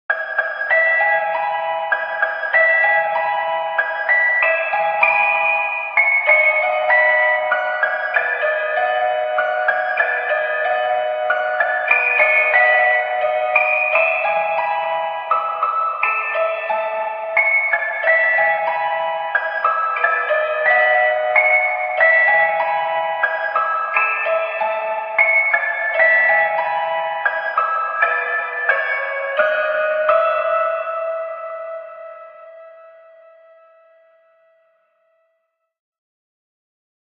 Creepy Lullaby, A
I created a music box sampler and played an improvisation of Brahms' "Wiegenlied", used a megaphone plugin and added a bunch of reverberation to create this creepy aesthetic.
An example of how you might credit is by putting this in the description/credits:
Originally created on 26th November 2017 with Kontakt and Cubase.
box, brahms, childlike, evil, haunted, horror, spooky, Spooly